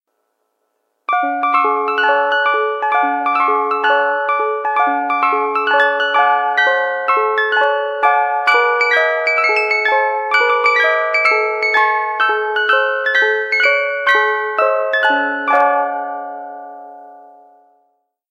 Here is Pop Goes The Weasel on an old clockwork chime. This is what ice cream vendors use to use way back in the old days when they didn't have digital ice cream chimes. This is part of my new Clockwork Chimes pack. Be sure to check for updates when I find more old clockwork chimes. Thanks, and hope you enjoy this new sound pack.